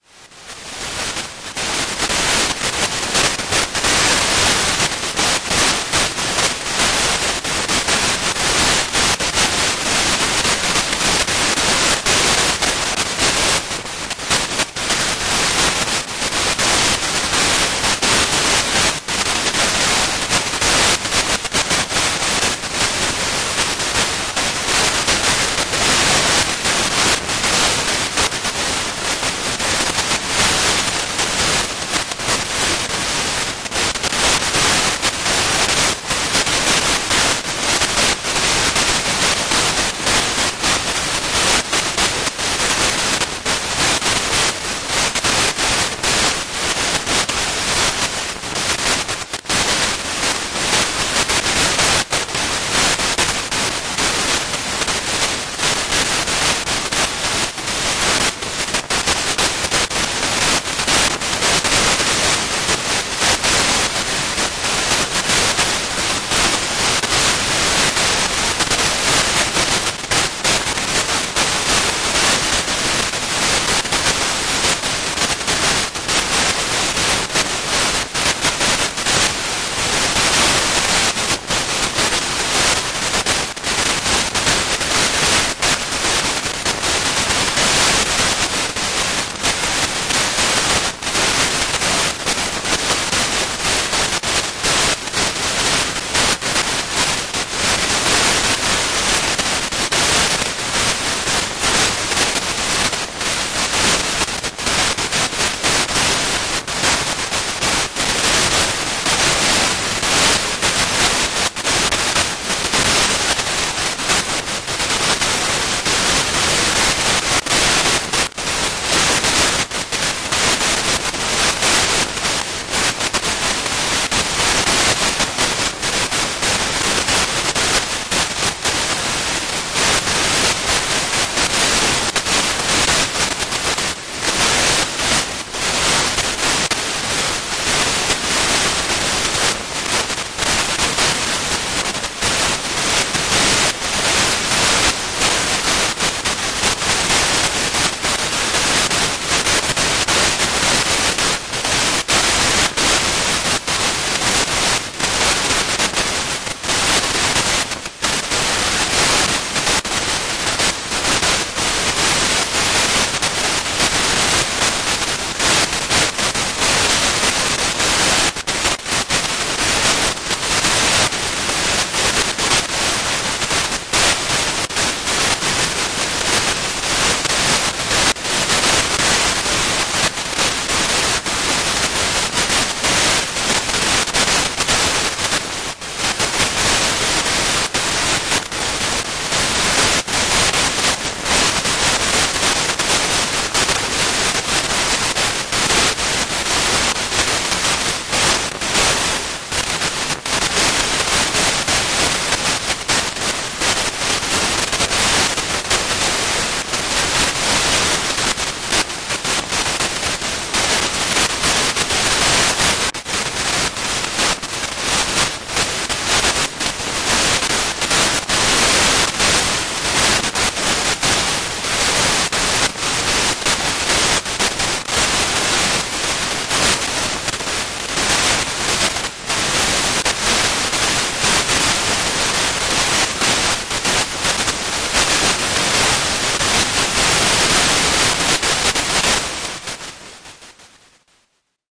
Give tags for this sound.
radio; foley; static; crackle; disturbence; receiver; sputter; noise; antenna

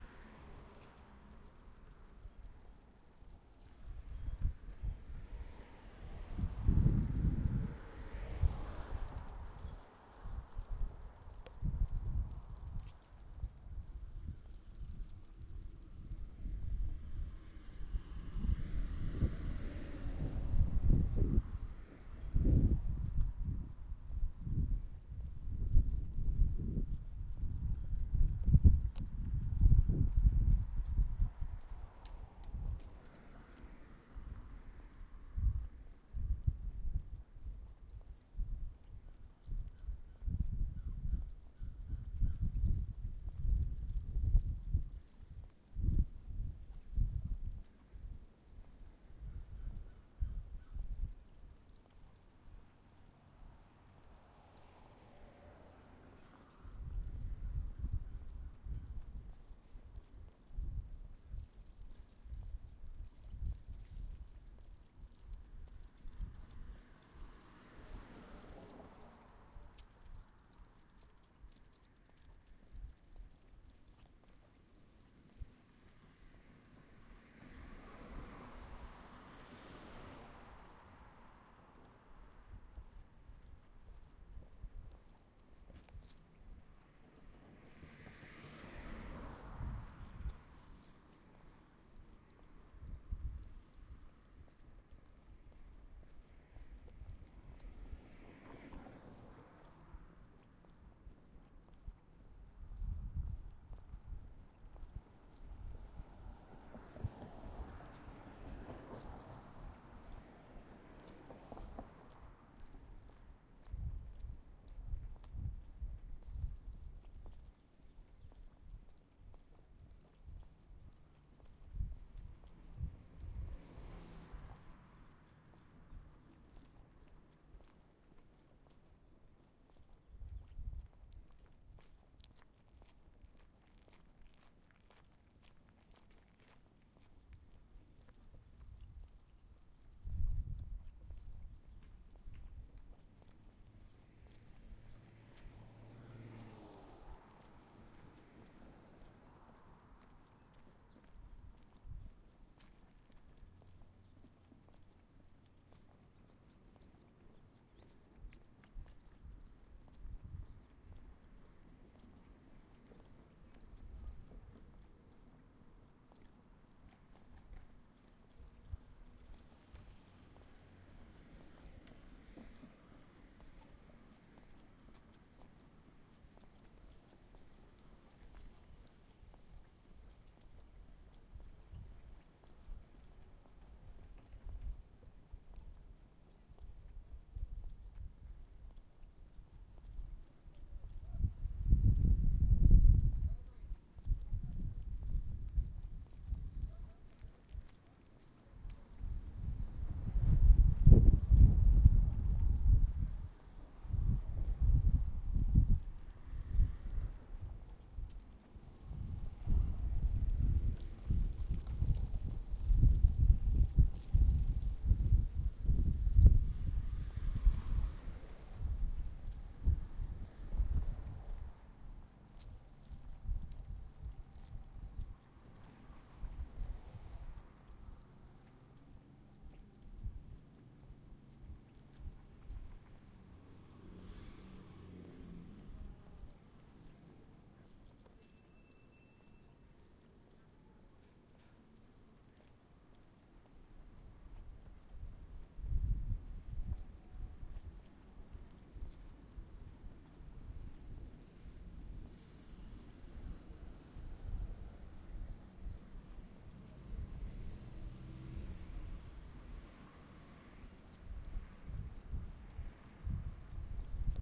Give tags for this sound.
wind; walking; ambience; neighborhood; Minnesota; spring; field-recording; footsteps; Minneapolis

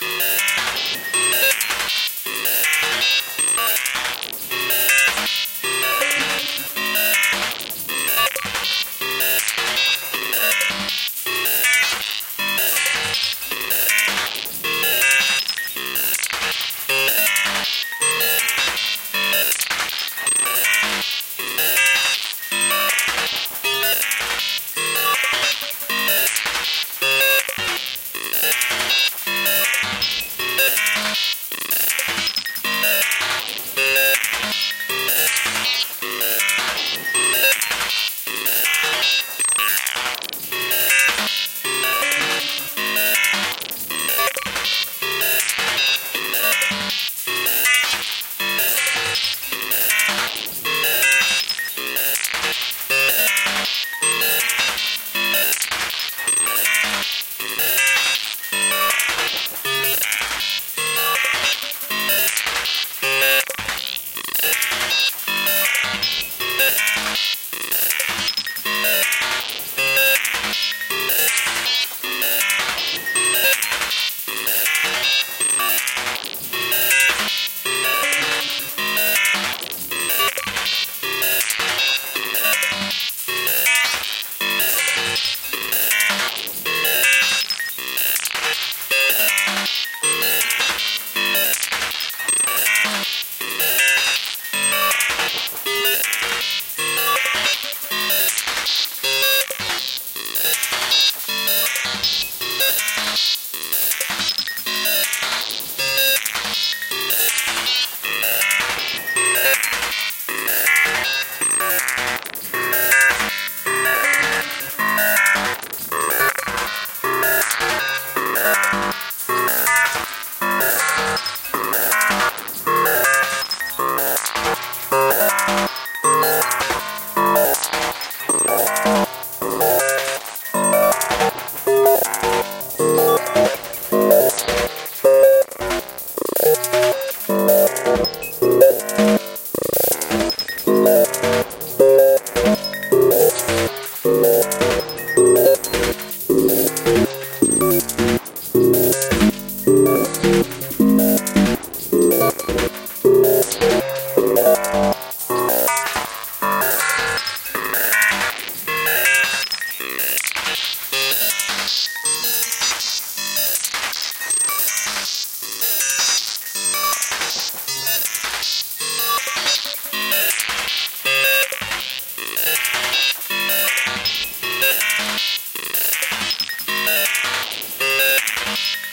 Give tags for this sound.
strange; abstract; modular; synthesizer; loop; weird; noise; digital; synth; electronic

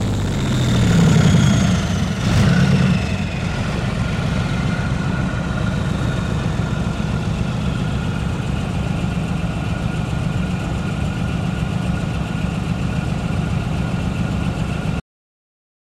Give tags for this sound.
field-recording; turbo; accelerate; Leopard2A4; warfare; engine; close

